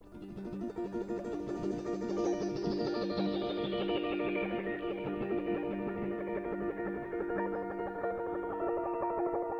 guitar ambient
another loop with my guitar this time without distorsion and more peaceful. Edited with Logic.
guitar, ambient, loop